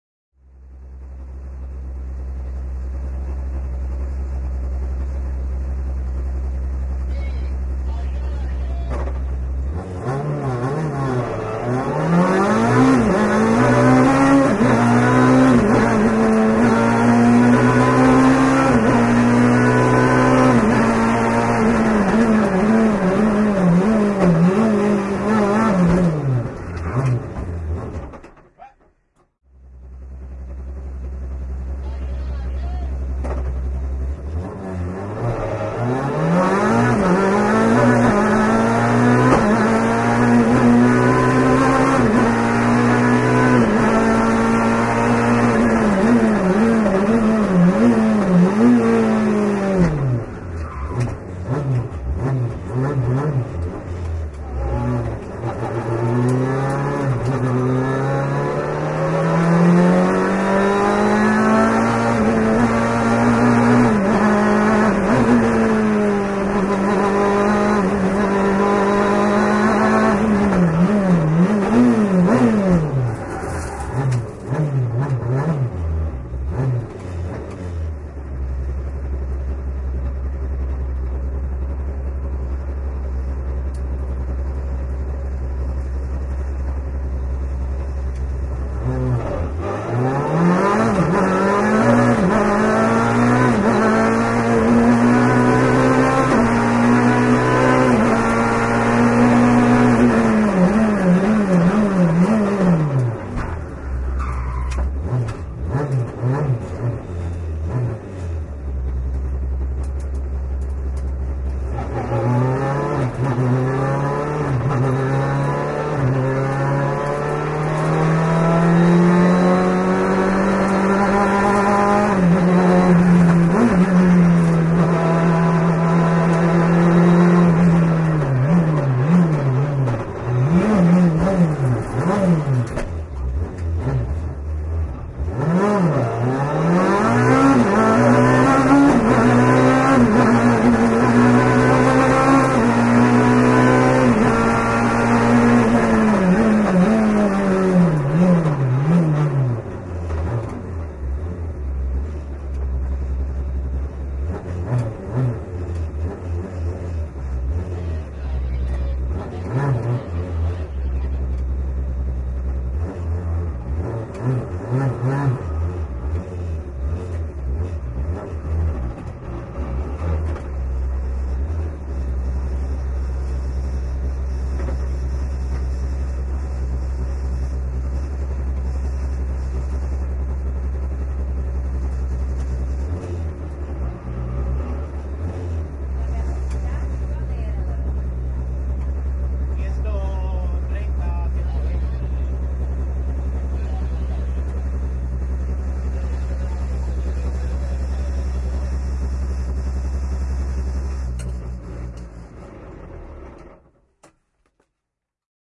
Interior Rally Racing Car
Sound recorded using a Edirol R09HR, inside a rally racing car